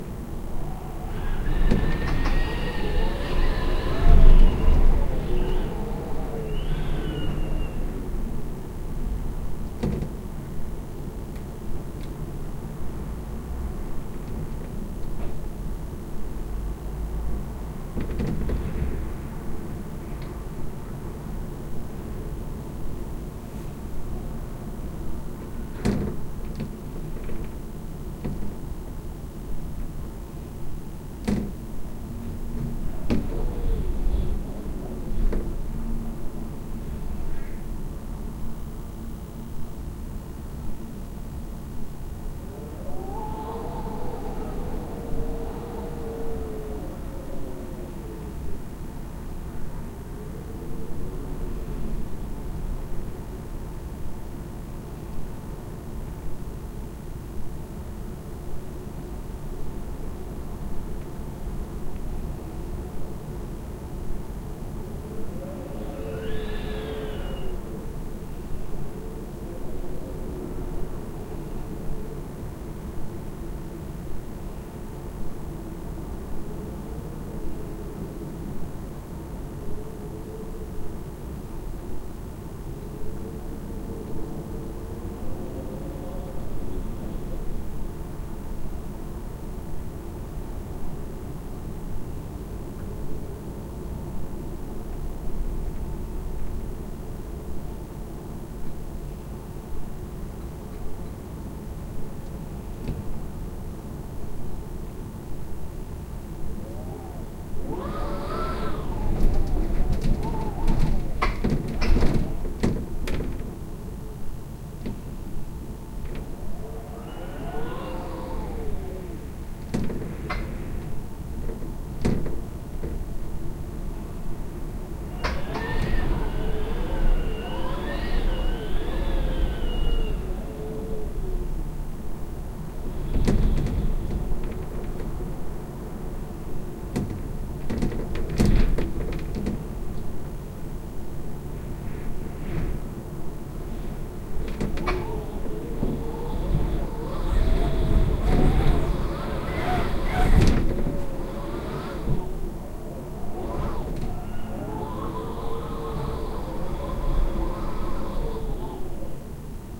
Stereo binaural recording. Wind howling around the windows of a highrise. Windows rattling, eerie atmosphere.
binaural, cold, eerie, field-recording, howling, rattling, stereo, wind, window, windows, winter